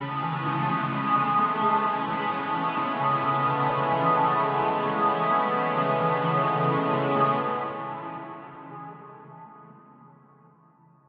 A luscious pad/atmosphere perfect for use in soundtrack/scoring, chillwave, liquid funk, dnb, house/progressive, breakbeats, trance, rnb, indie, synthpop, electro, ambient, IDM, downtempo etc.
effects, morphing, house, expansive, luscious, reverb, melodic, atmosphere, soundscape, dreamy, progressive, 130-bpm, ambience, evolving, wide, long, pad, 130, liquid